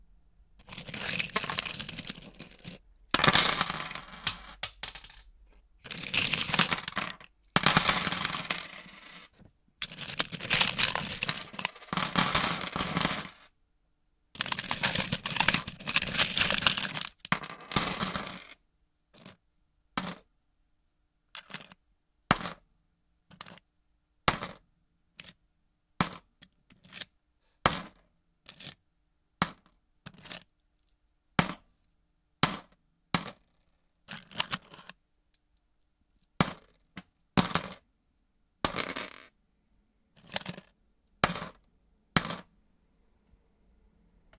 Searching coins.
{"fr":"Pièces de monnaie","desc":"Chercher des pièces.","tags":"piece monnaie argent sous"}